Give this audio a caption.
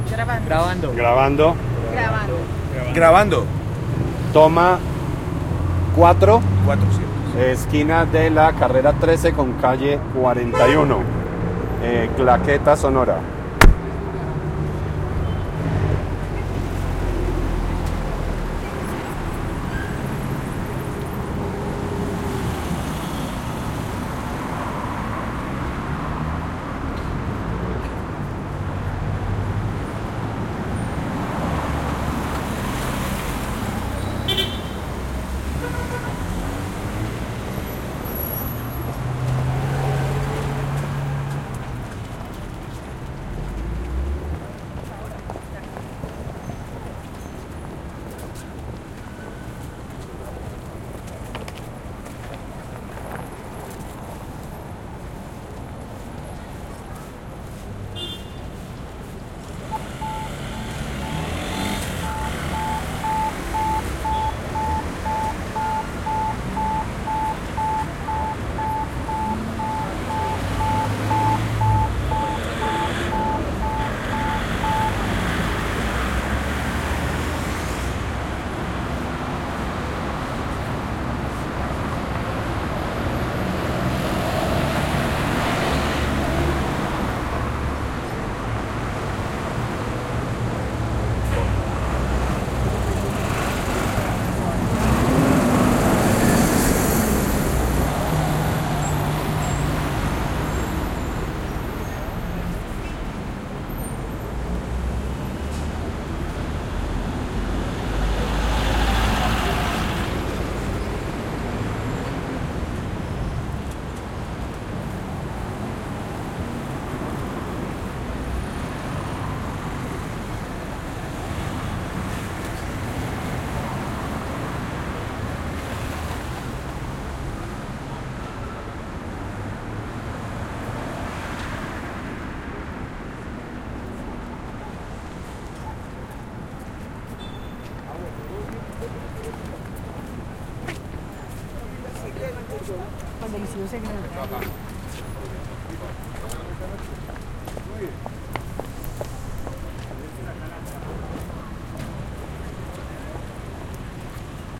toma-04 roberto cuervo
Field recording of Bogota city in Chapinero locality, around 39 and 42 street, between 7th end 16th avenue.
This is a part of a research called "Information system about sound art in Colombia"
PAISAJE-SONORO, SONIDOS-PATRIMONIABLES, SOUND-HERITAGE, SOUNDSCAPE, VECTORES-SONOROS